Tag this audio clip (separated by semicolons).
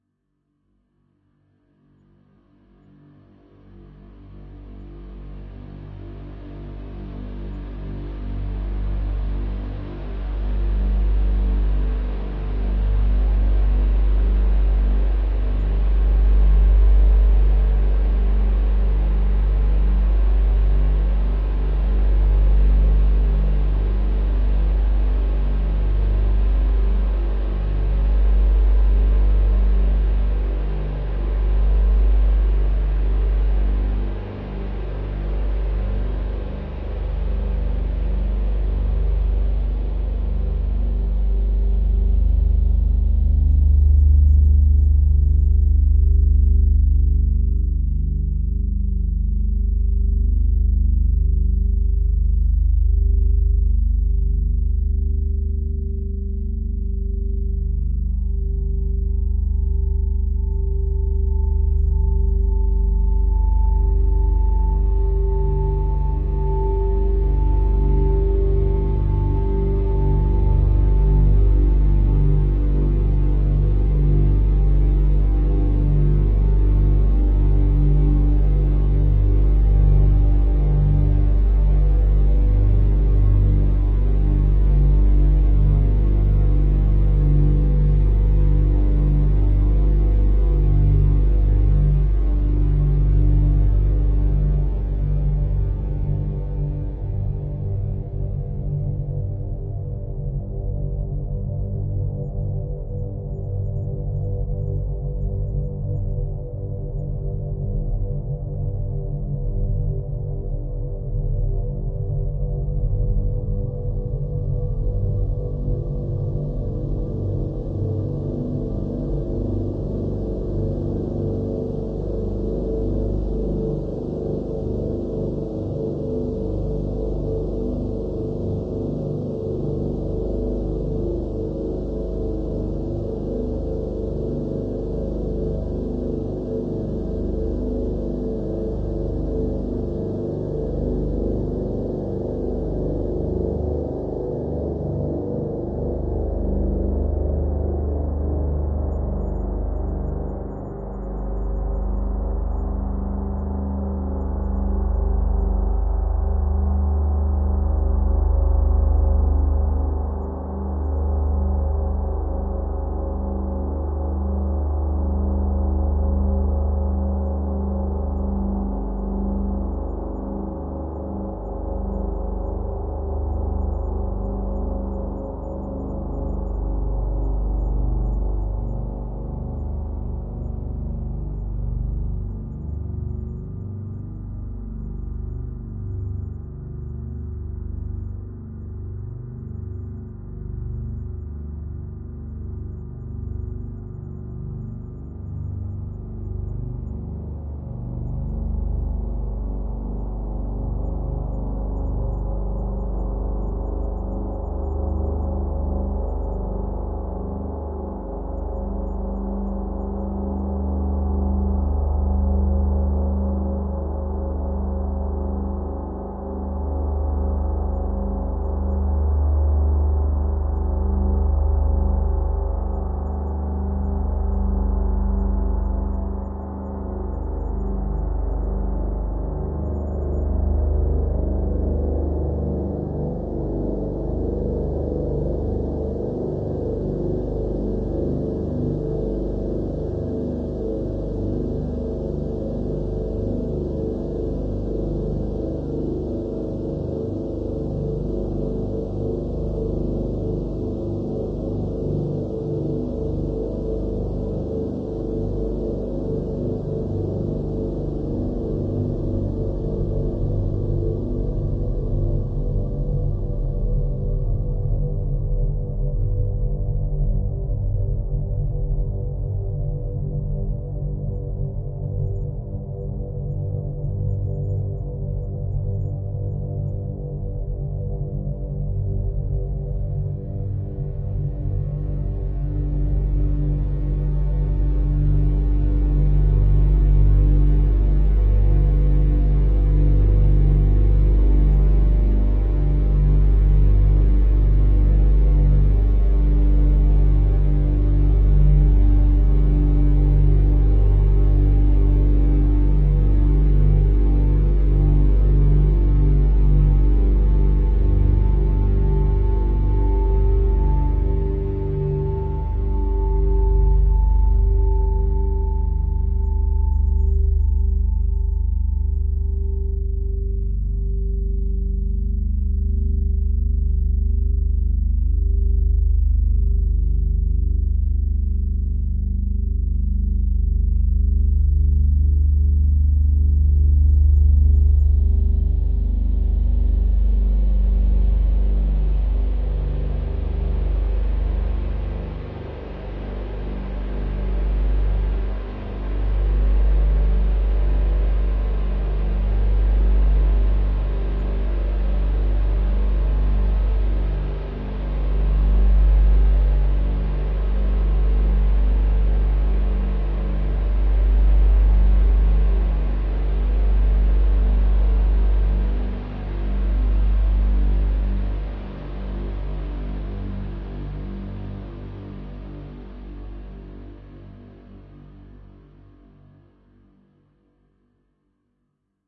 bass; drone; ominous; scary